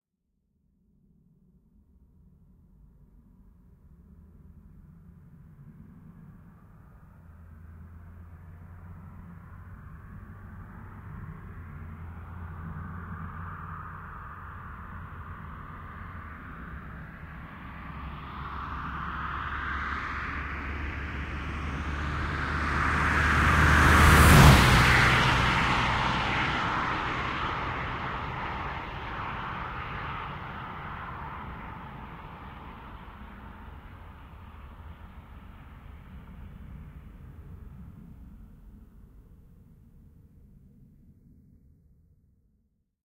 BMW 420D Gran Coupe exterior passby 140kmph high rpm stereo ORTF 8040
This ambient sound effect was recorded with high quality sound equipment and comes from a sound library called BMW 420D Gran Coupe which is pack of 72 high quality audio files with a total length of 166 minutes. In this library you'll find various engine sounds recorded onboard and from exterior perspectives, along with foley and other sound effects.
diesel, drive, driving, exterior, high, motive, vehicle, very, whoosh